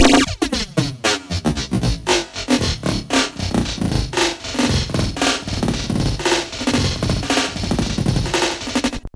A drum loop sampled from a toy guitar.